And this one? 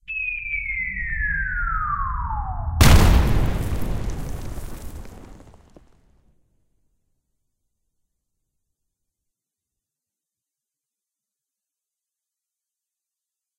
Incoming Artillery
A shell whistles overhead before exploding with debris. Includes some bass ambiance that sounds good on a subwoofer or headphones. (This was designed for theater.)
The whistle effect isn't the best.